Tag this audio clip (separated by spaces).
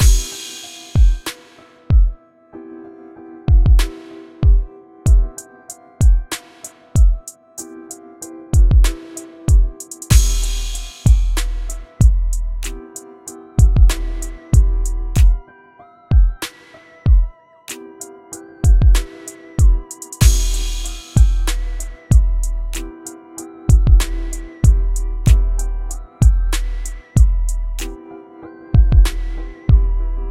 2016 Hip bass beat drum free hard hop instrumental kick loop new random rap snare trap